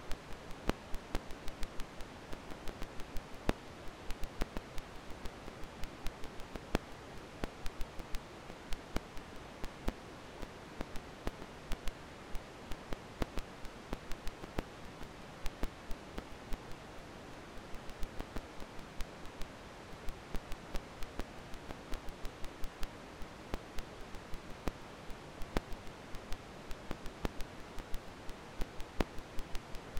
1974 33 rpm record crackle (low wear)
A record crackle I built in Audacity. The year and rpm are in the file name.